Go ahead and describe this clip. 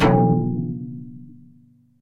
big perc
Layered percussion with futuristic sound.